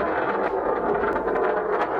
foosball loop sound effect
the ball of the foosball, it loops fine